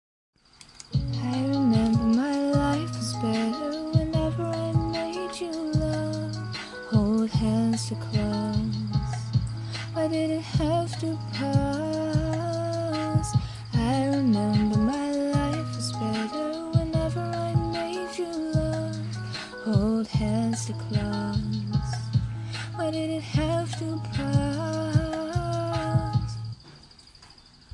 Sorry if this isn't all that good; I'm not the best singer on the block. I further apologise for the background music, if that wasn't wanted in the hook. Hope it's O.K though.